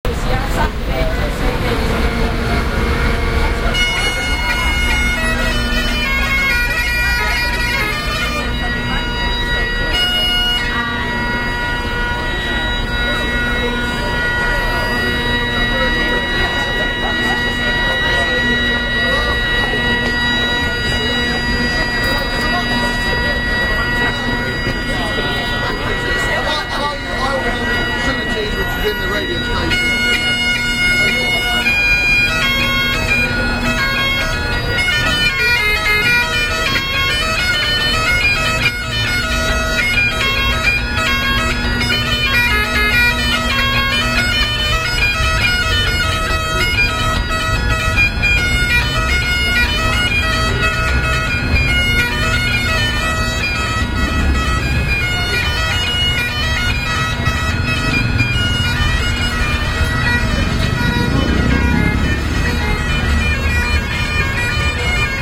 Kings Cross - Bagpipes outside Station
ambiance, ambience, ambient, atmosphere, background-sound, city, field-recording, general-noise, london, soundscape